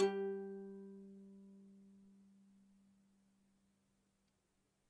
G octave pick soft
Bouzouki, Plucked-String, Strings, Plucked